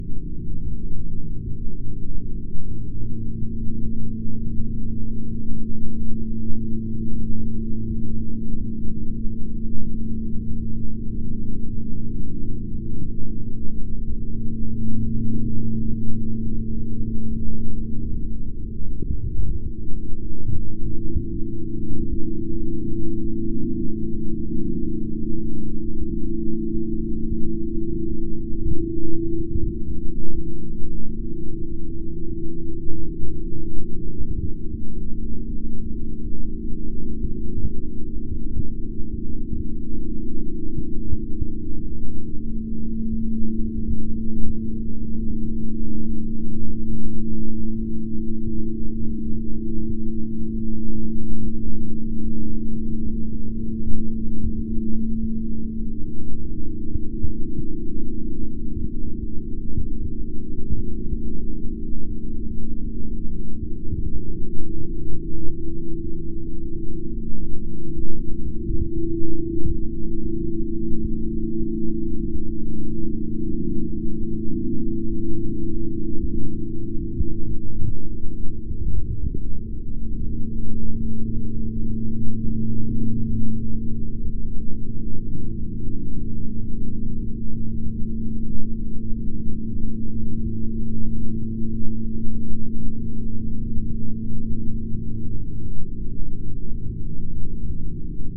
This is the sound of my scanner working. It makes me sleepy and gives me a false sense of cold.